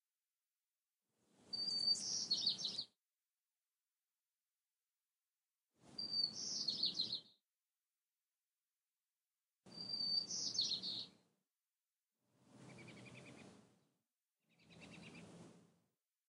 Birds chirping outside my front door. Probably Purple Finch with a Robin at the end.